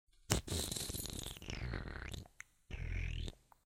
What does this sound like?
lick easy 8/14
air, ambiance, ambient, breath, breathing, deep